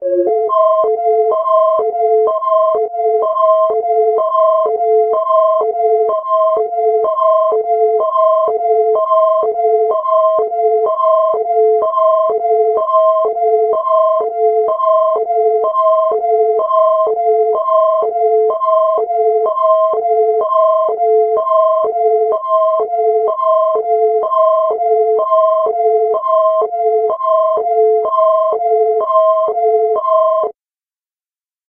Synth emulated emergency car siren.
ambulance, simulated, emergency, synthesized, siren, alarm